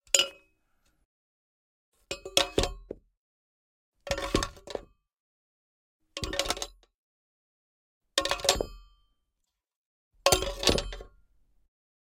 Dropping metal objects
Dropping random solid metal objects (poles, cans, a big magnet) on concrete floor.
GEAR:
Neuman TLM-102
Orion Antelope
FORMAT: